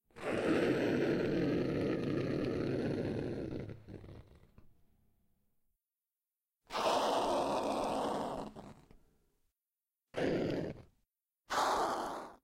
Kind of lizardy, but could be fine for something feline as well.
Recorded into Pro Tools with an Audio Technica AT 2035 through the Digidesign 003's preamps. Some time stretching and pitch shifting to get the character right as well.